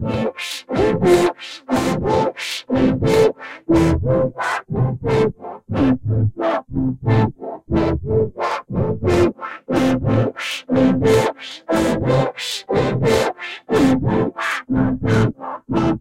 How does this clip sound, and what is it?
Raven 2 loop
Proof of concept that a musical loop with lots of instruments can still be processed into something very different and usable in a different musical context.
Used luckylittleraven's loop:
Volcano dual filter with various LFOs controlling the frequency of the two filters in series mode.
The cadence of the filter movement gives this loop a slower pace than the original, while the range of the frequency sweep still manages to keep some drama/excitment.
filter, musical, music